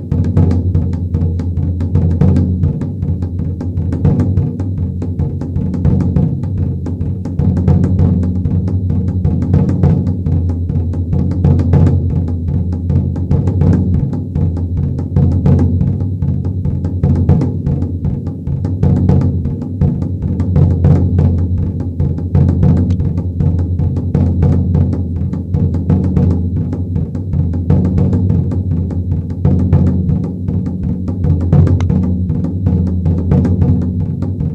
drum fragments music composition toolbox
fragments, composition, drum, music, toolbox